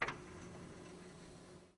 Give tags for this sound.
robotic; 268791; robot; mechanical; printer; electronic; servo; machine; machinery

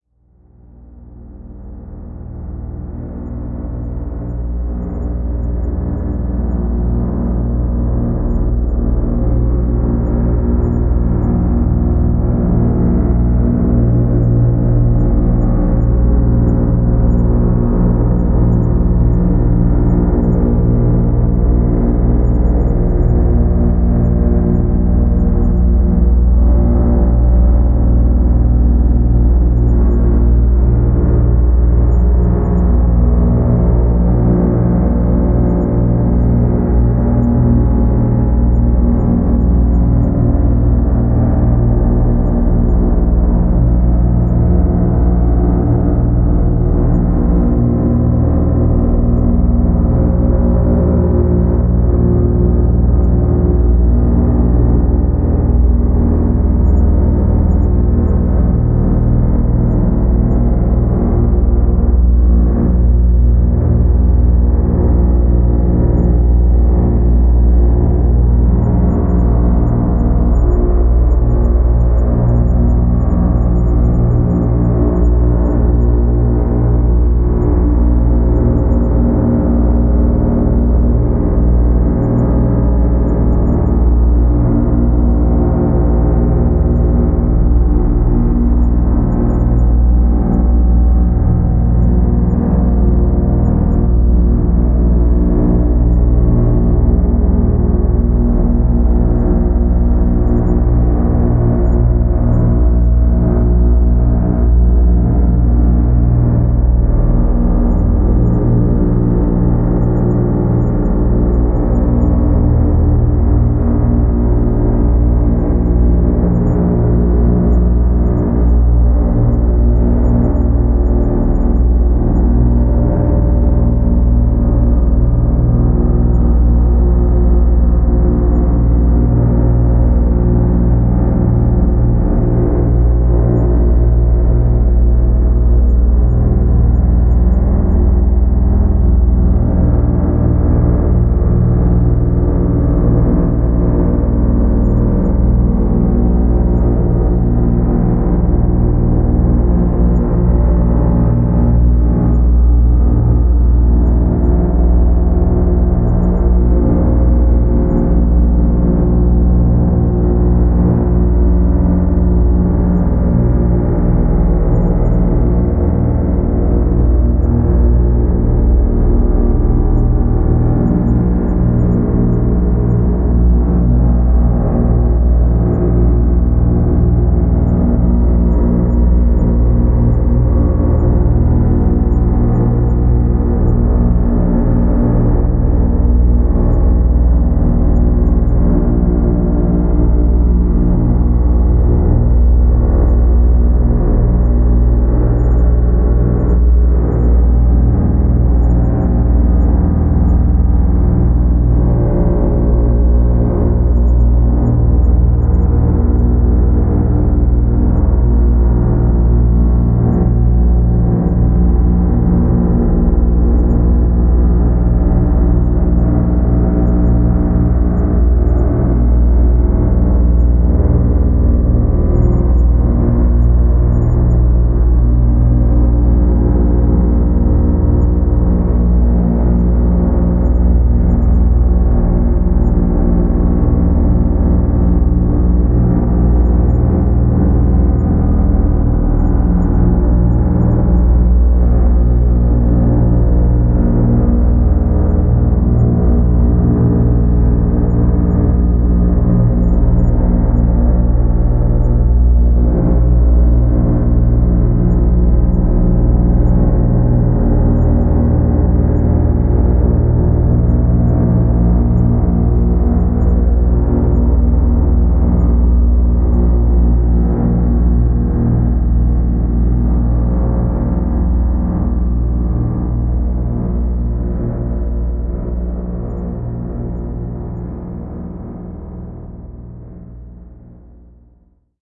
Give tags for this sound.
atmosphere; cinematic; dark; drone; processed; sci-fi; silo

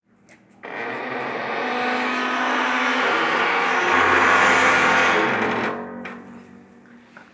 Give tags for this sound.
creak; creaking; creepy; door; hinge; spring; squeak

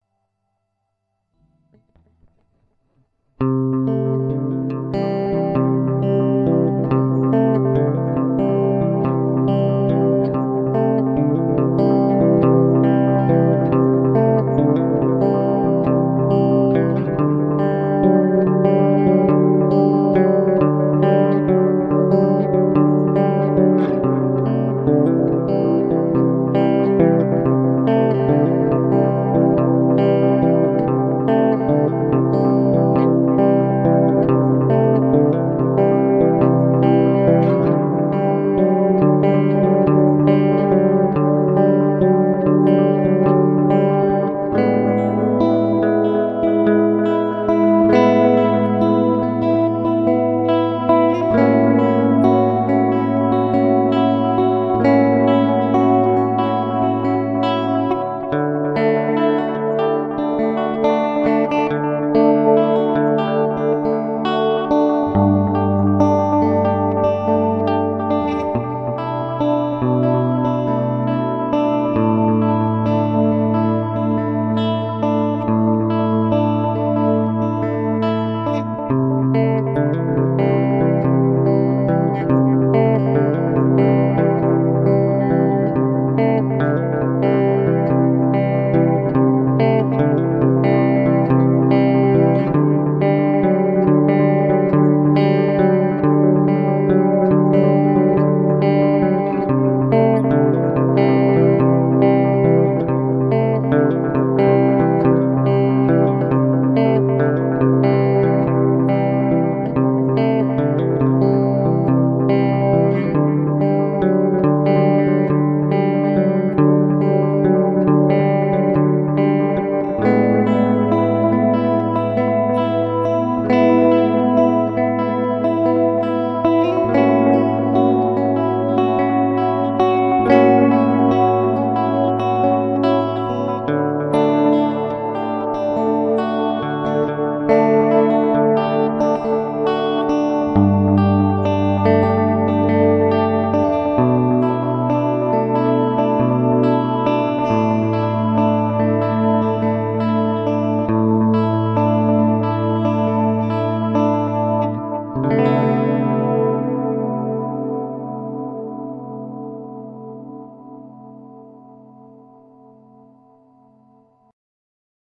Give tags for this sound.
atmosphere; relax; Qiuet; electric-guitar; space; sound; ambient; sample; Melodic; experimental; effect; ambience; music; chords; delay; guitar; open-chords; ambiance; song; echo

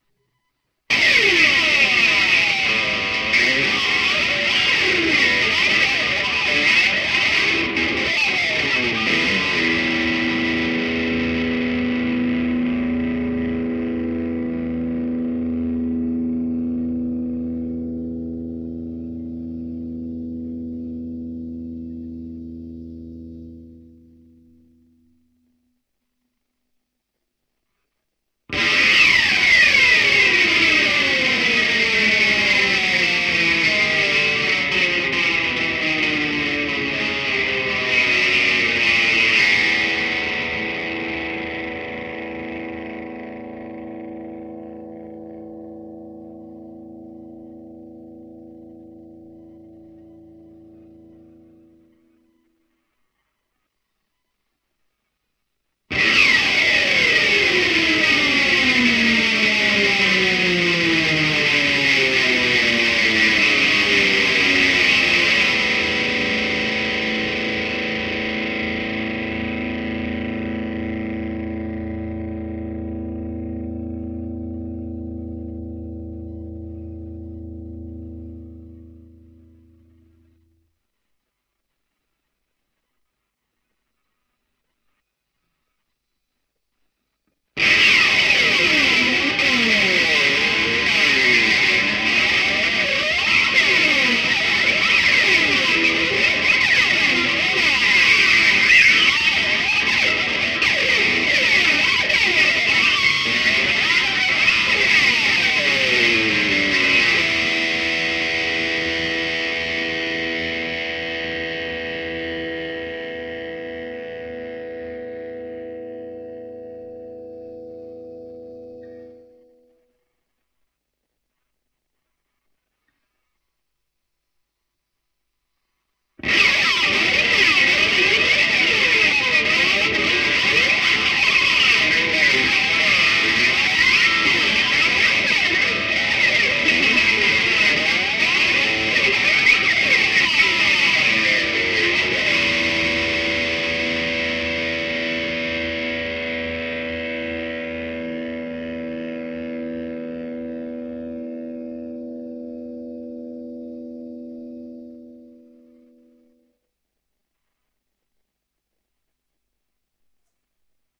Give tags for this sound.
electric-guitar; noise; field-recording; distortion; electric; guitar; slide

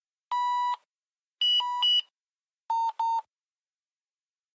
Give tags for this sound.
ovchipkaart; ov-chip-kaart; bunnik; field-recording; ov; nederlandse-spoorwegen; beep; dutch; beeps; chip; trains; station; checkin; nederland; ns; card; train; beeping

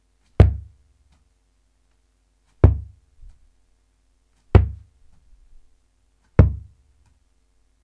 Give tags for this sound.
ball; bouncing

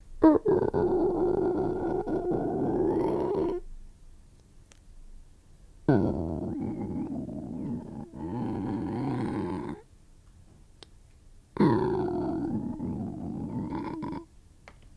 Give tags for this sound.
growl; hungry; person; stomach

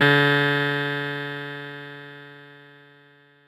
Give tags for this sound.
cross; echo; feedback

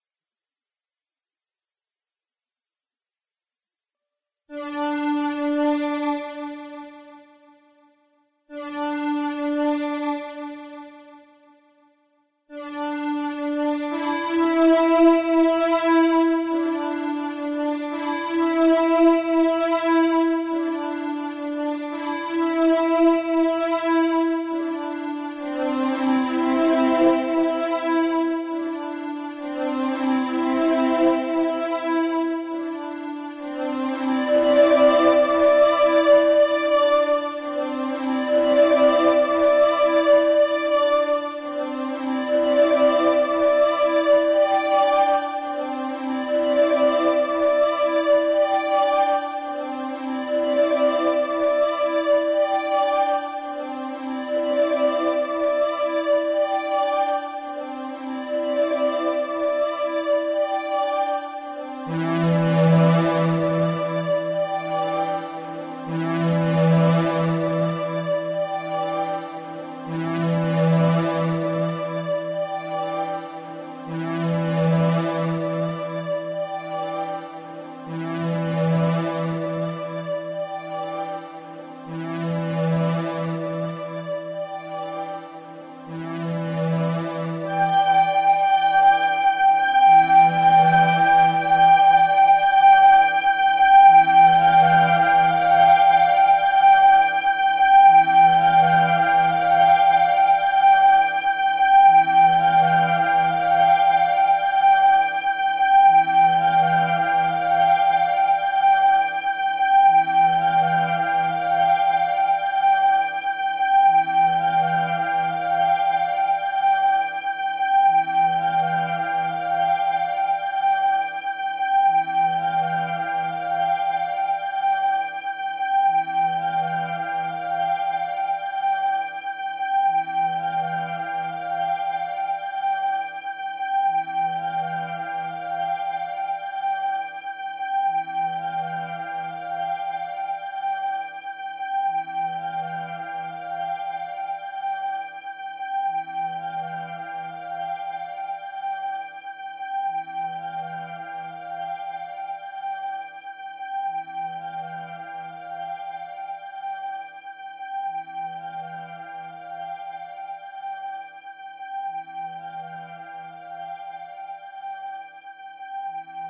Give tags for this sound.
Elottronix; Ableton-Live; atmospheric; Fripp; soundscape; Frippertronics; Eno

